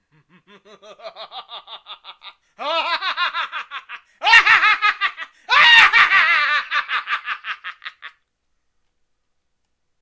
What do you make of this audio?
evil laugh-18
After making them ash up with Analogchill's Scream file i got bored and made this small pack of evil laughs.
cackle, evil, horror, joker, laugh, long, lunatic, mad, male, multiple, scientist, single, solo